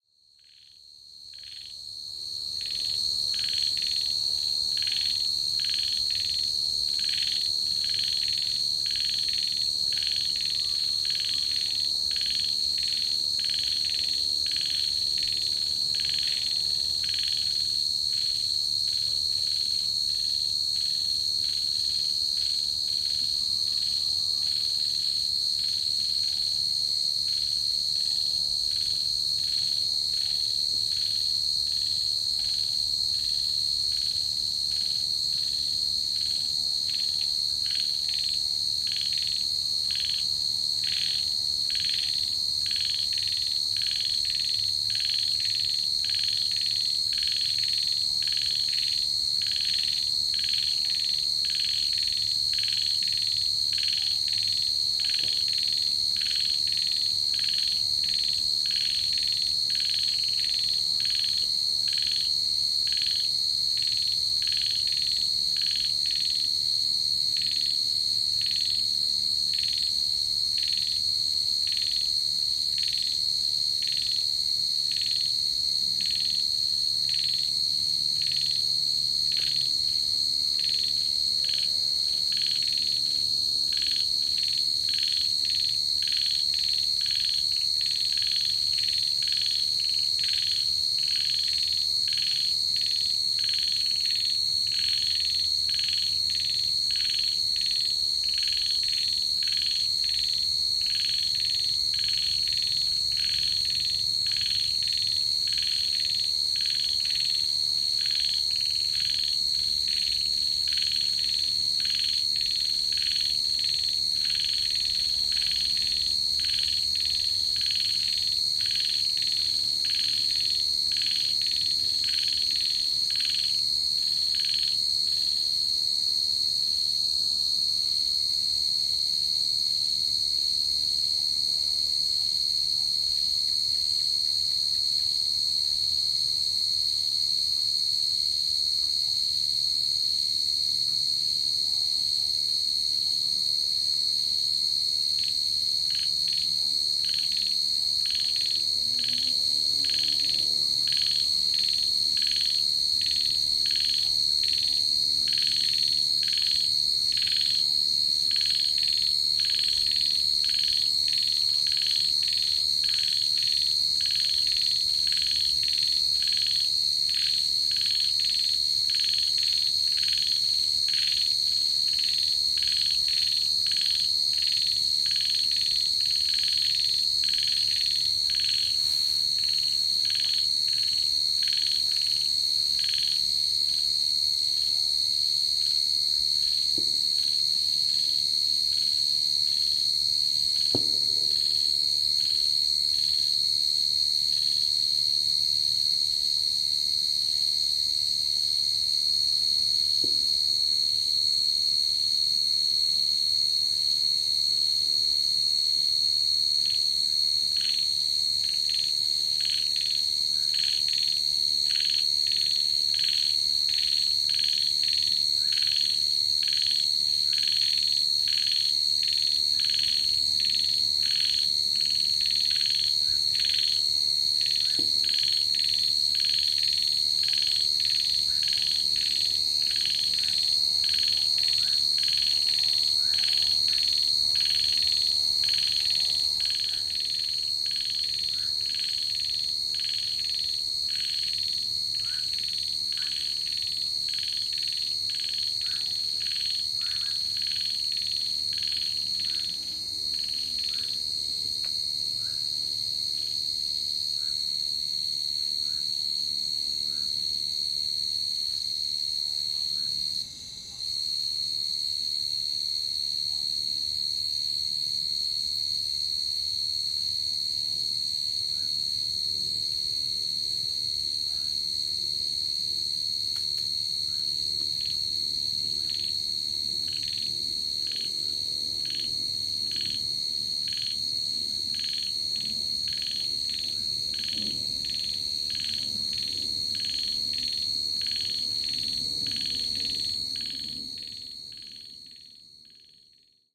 Recorded ambient sound in my garden in Thailand. See file name for time of day. Recorded by Alex Boyesen from Digital Mixes based in Chiang Mai production and post production audio services.